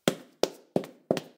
A female that's being chased, late for work, or both pick your poison.